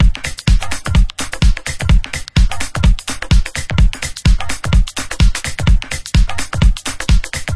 House Loop 127bpm
127bpm, beat, drum, drumloop, drums, house, percussion, rhythm